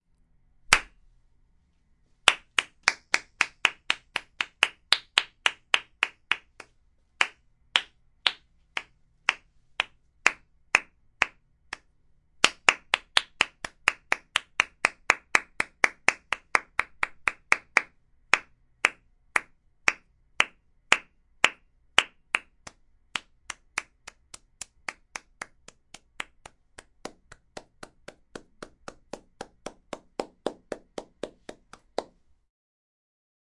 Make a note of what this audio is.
108-Applause Crowd
Applause, Dry, Crowd, Clapping